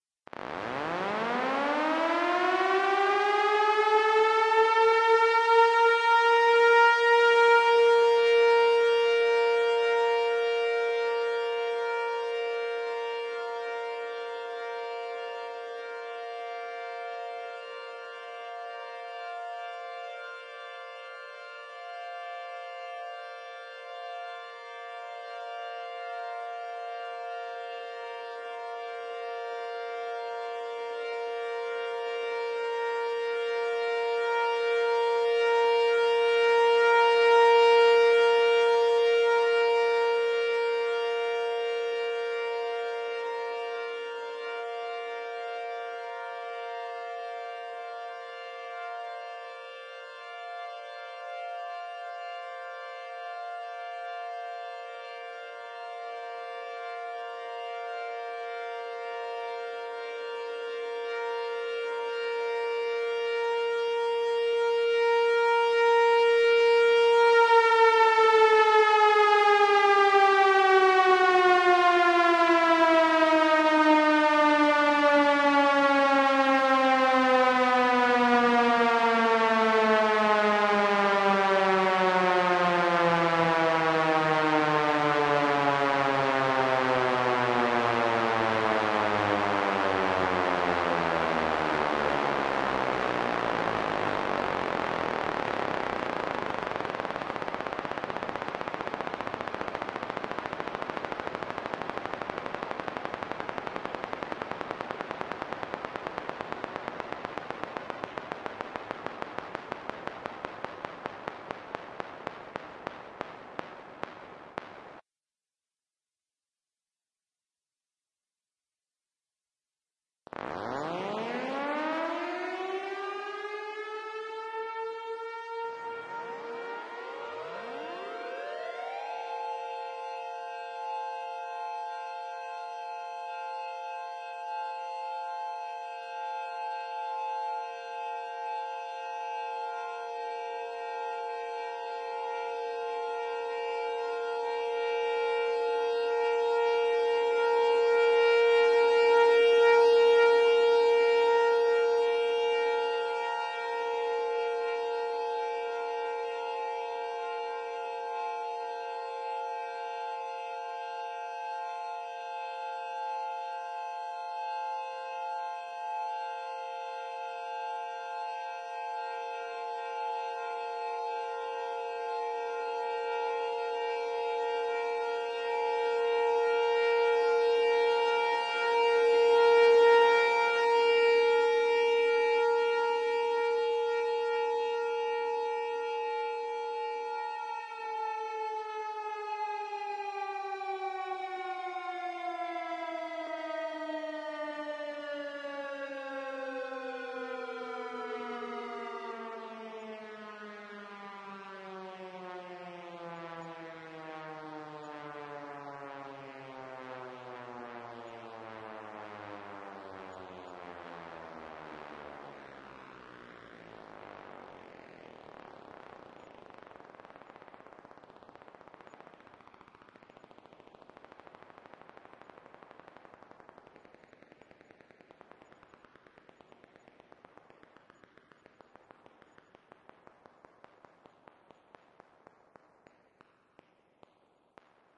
Okarche, OK Replacement Synth
Okarche currently has a 2T22, Screamer, and T-128. But what if the 2T22 was replaced by a Modulator? Well this Video will show you what it currently sounds like, and what it will sound like with the Modulator.